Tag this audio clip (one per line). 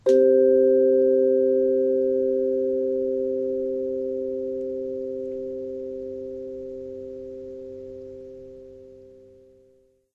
chord
mallets
percussion
vibraphone